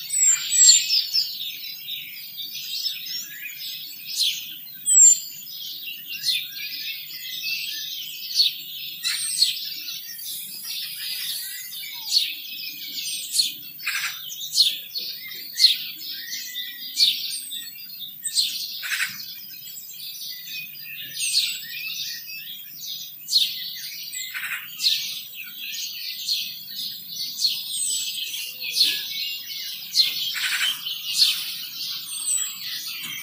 birds singing in the garden
birds singing in my garden
garden, field-recording, sparrow, birds, singing, ambient, spring, bird